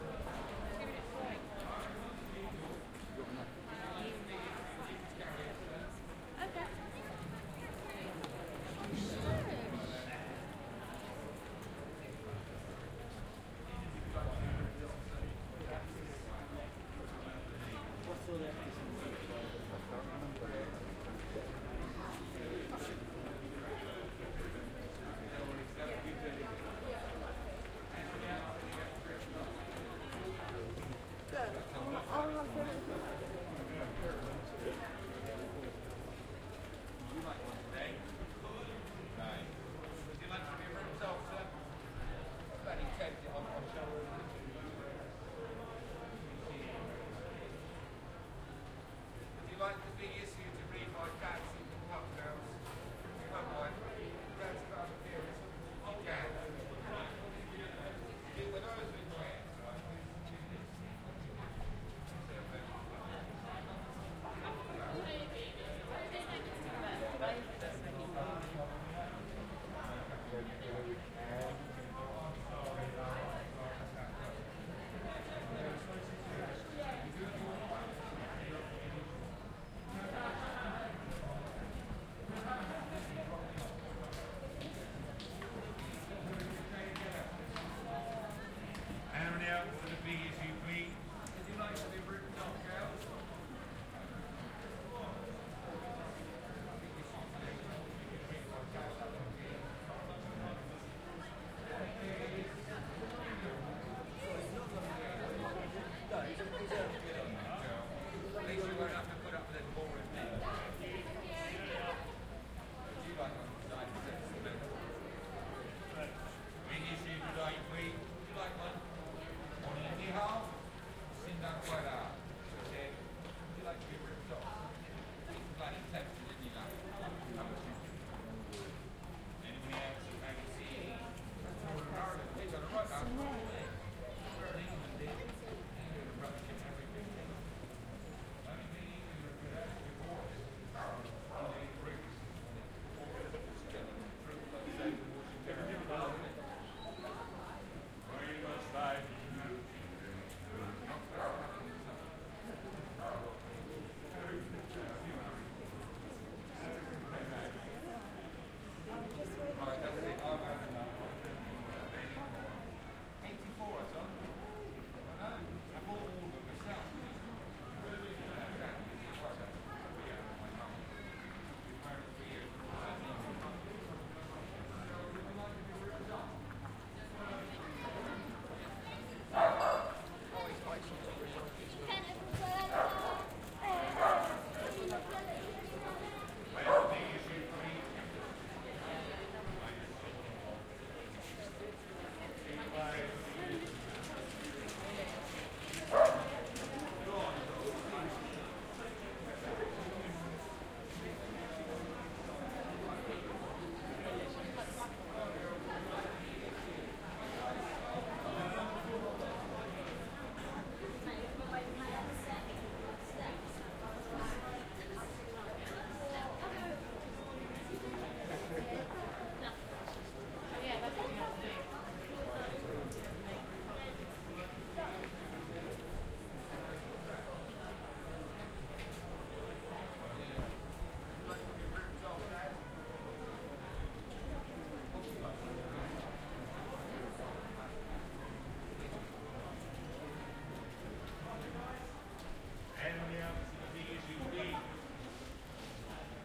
Binaural Street sounds Winchester
Standing in the pedestrian area of Winchester. Zoom H1 with Roland in-ear mics CS-10EM